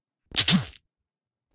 Sci-Fi Weapon or something like this...
This sound just appeared in one of my recordings. Not propositional, but very cool... sounds like some technological weapon, or some device being turned on or off... use at will